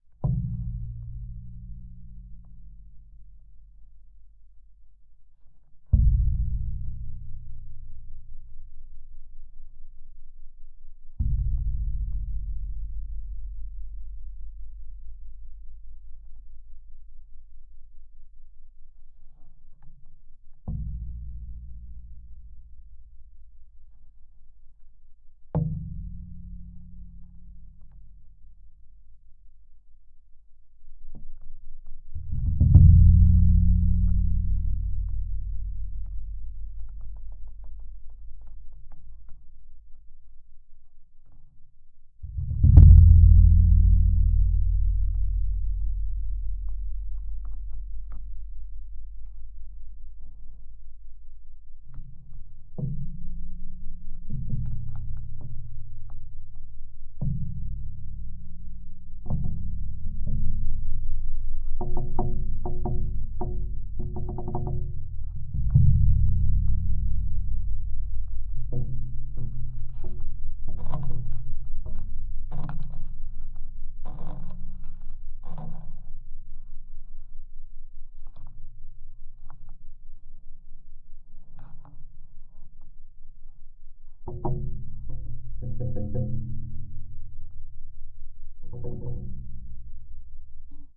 FX SaSc Low Heavy Metal Impacts 01 Hits Metallic Fence Geofon
Low Heavy Metal Impacts 01 Hits Metallic Fence Geofon